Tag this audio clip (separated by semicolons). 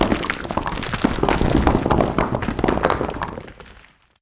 break; breaking; brick; bricks; crush; crushing; dirt; dirty; drop; dropping; fall; falling; gravel; noise; noisy; rock; rocks; scatter; scattering; stone; stones